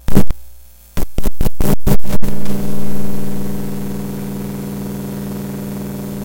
Some interesting noise clipped while recording.